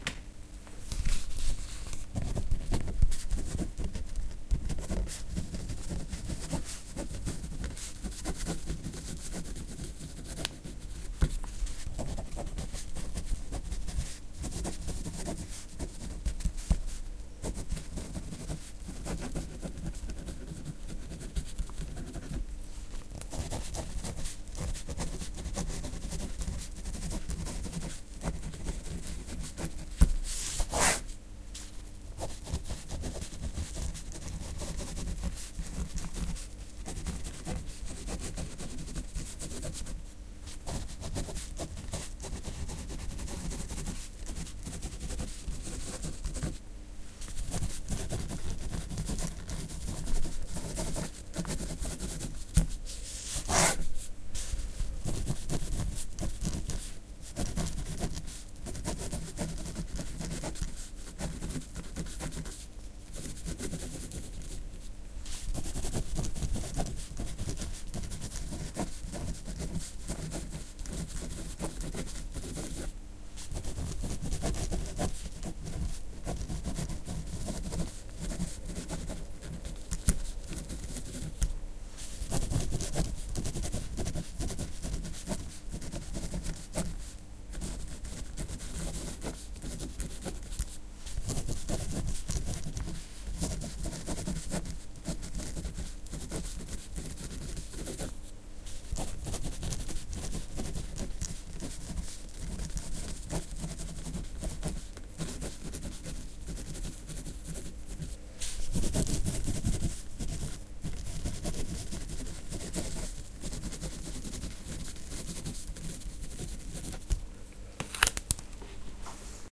A 2/3 full plastic ballpoint pen writes in blue on a blanco A4 paper. Recorded with a Zoom H5N.
paper, ballpoint, pen, write, scribbling, writing, signature